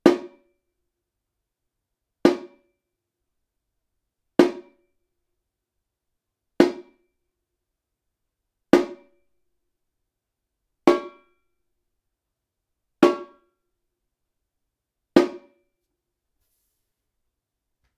repinique-head
A repinique (samba drum), hit on the head with a wooden stick.
Recording hardware: Apogee One, built-in microphone
Recording software: Audacity